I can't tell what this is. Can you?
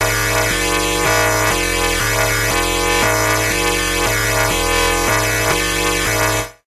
eventsounds4 - Distorted Alarm
bleep, blip, bootup, click, clicks, desktop, effect, event, game, intro, intros, sfx, sound, startup